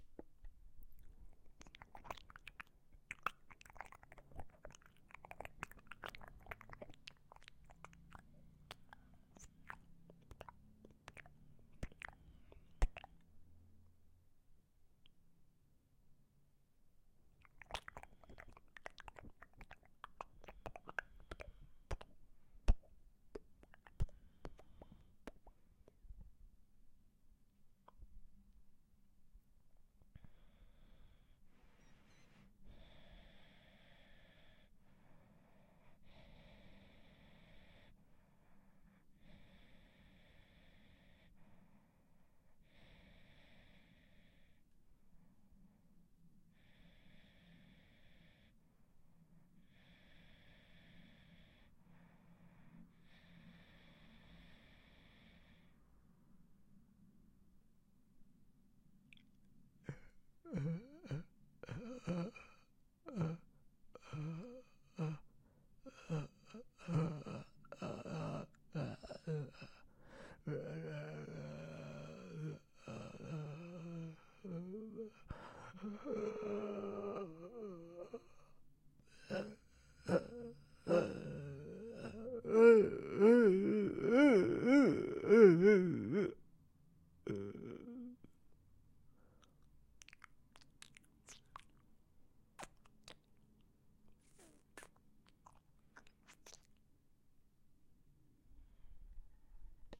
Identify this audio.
110819-002 boca rui#362B30B
A crude recording of wet sound made with my mouth (some funny moans too)
Una grabación cruda de sonidos hechos con mi boca, entre húmedos y expresivos.
grabados con una zoom h4-n
impersonation, vocal, wet-sounds, Zoom-H4N